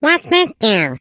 Computer game character sound. Created as part of the IDGA 48 hour game making competition.
character, cheer, computer, game, lizard, vocalisation, vox